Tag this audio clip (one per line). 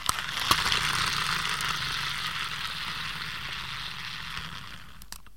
rolling
car